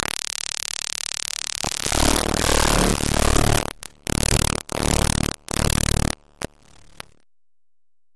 This is the sound of a picture. Made with Audacity.